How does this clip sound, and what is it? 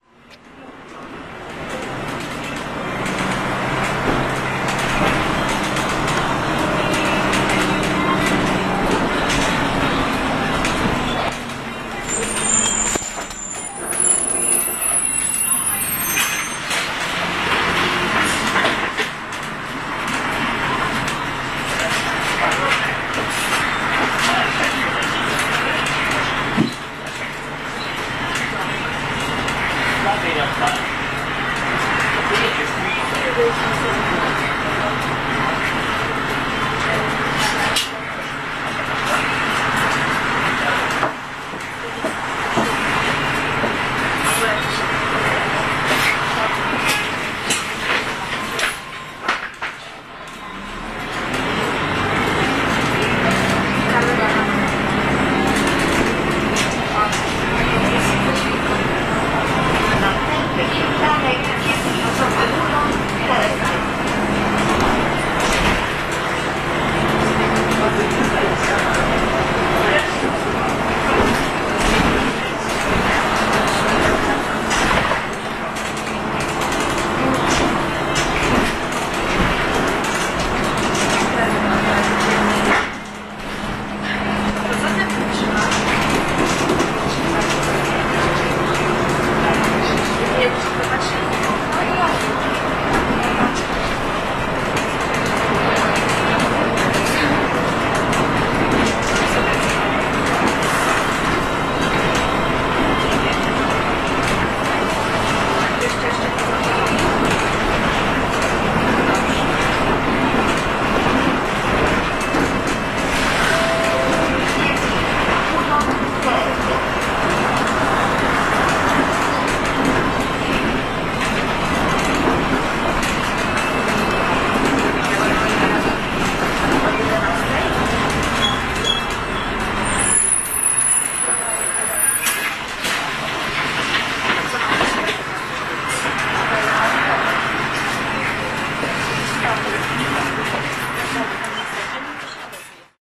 jeleniagora bus020710
02.07.2010: about 9.30. Sobieszow (Jelenia Gora district in the Low Silesia region in south-west Poland). in the bus between two bus-stops (Muflon and Urzad Celny) on the Cieplicka street.
jelenia-gora, punch-ones-ticket, steps, mzk, engine, voices, poland, noise, field-recording, bus-service, sobieszow, people, bus